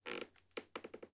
Wood Floor Creaking 2 2
Parquet, Sound, Design, Small, Recording, Crack, Ground, Sneaking, Footstep, Foley, Rubbing, Wooden, Creak, Long, Sneak, Noise, Wood, Creaking, Floor, Real, Short, Step, Surface, Walking